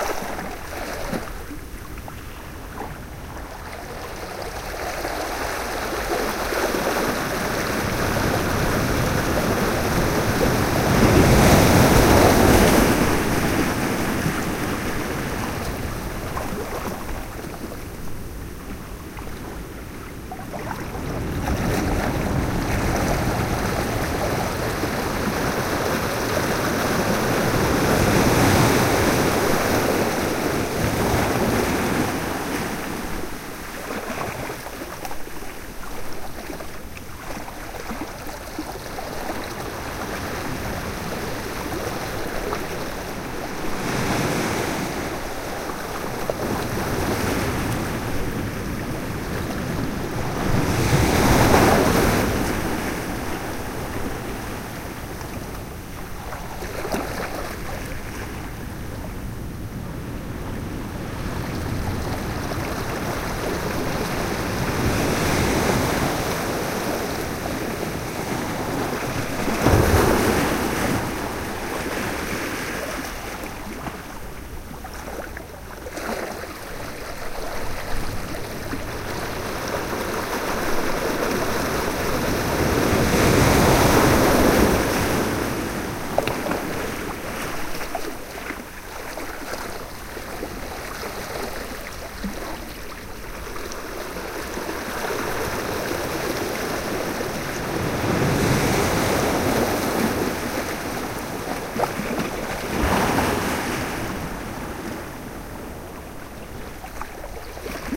Ocean waves at Point Reyes. Edited as a loop.
Using a Sony MZ-RH1 Minidisc recorder with unmodified Panasonic WM-61 electret condenser microphone capsules. The left and right omnidirectional capsules are separately mounted in lavalier housings that allow independent placement when recording.